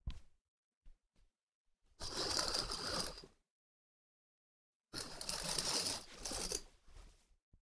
Curtain open and close
Curtain being opened and closed